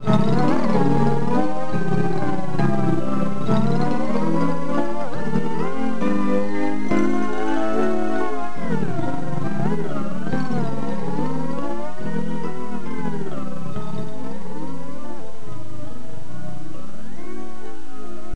vhs artifacts
This sample was created by layering short, improvised passages on a Yamaha PSR-275 into a Boss DD-20 in Sound On Sound mode. Loop was played into a Boss ME-30 on harmonizer and pitch shifter settings, processed in real time, then faded out. Audio was further processed in Goldwave (compression, added hiss, vibrato, etc.) to give it a more believeable "old VHS tape" sound. I cut it to only include the most convincing segment of audio. (It's not perfect, but it's only an emulation!)